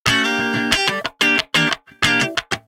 Funky Electric Guitar Sample 7 - 90 BPM
Recorded with Gibson Les Paul using P90 pickups into Ableton with minor processing.
guitar, rock